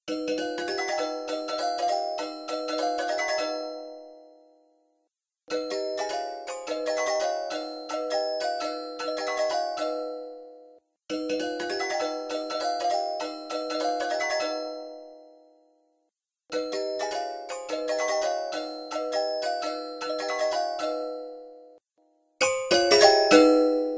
Jack in the box
Forward and backward.
pop-goes-the-weasel, toy